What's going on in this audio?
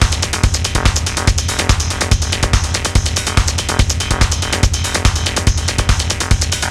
4-bar-techno-loop made with rebirth. slightly improved with some dynamics. before i provided a rebirth-mod with samples from thefreesoundproject.